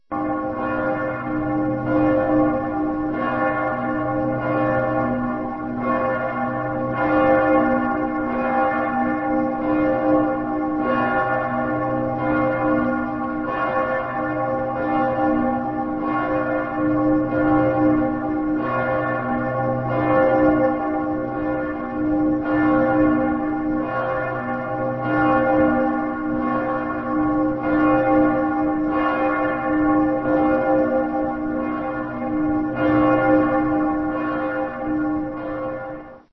this is a Kölner dom bell :Ursula .Record it the video myself with a blackberry phone (voicenote)